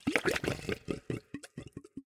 suck out 2
various sounds made using a short hose and a plastic box full of h2o.
blub, suck